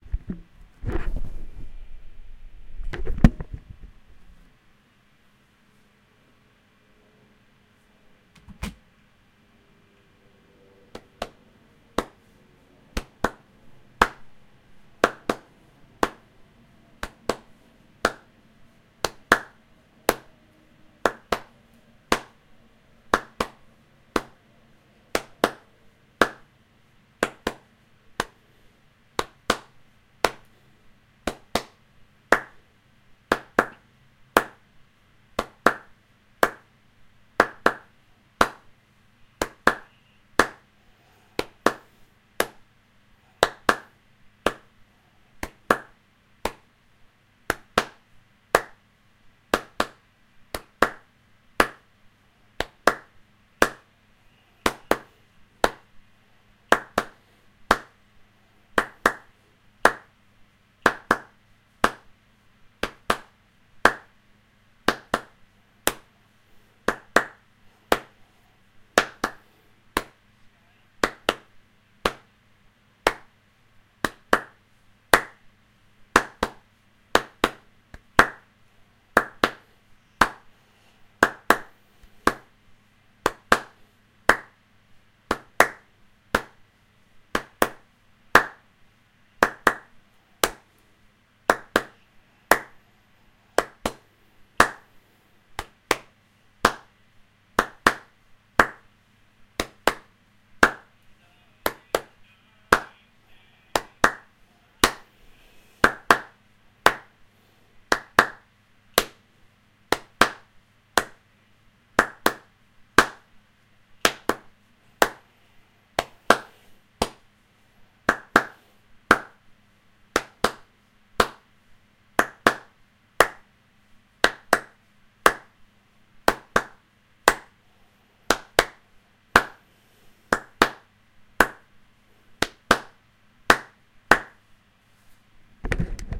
Clapping hands
Rhytmic clapping of hands
Recorded with Zoom H1 with rycote windscreen
Manual level
LoCut OFF
claps, group, hand, smack